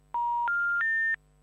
busy phone.R
busy, phone